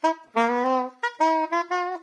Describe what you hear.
Non-sense sax.
Recorded mono with mic over the left hand.
I used it for a little interactive html internet composition:
loop, melody, sax, saxophone, soprano, soprano-sax